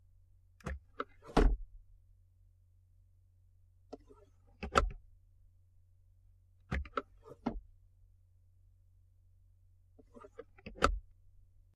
car - glove box
Open and close a glove box inside my car two times.